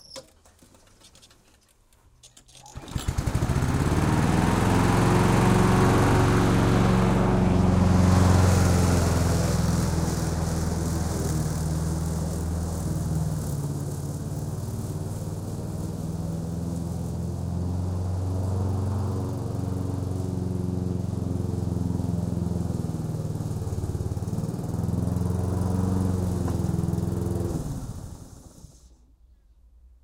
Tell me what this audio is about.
lawn mower exterior recorded from the ground start stop mowing from left to right close to distant stereo M10
This sound effect was recorded with high quality sound equipment and comes from a sound library called Lawn Mower which is pack of 63 high quality audio files with a total length of 64 minutes. In this library you'll find recordings different lawn mowers, including electric and gas engine ones.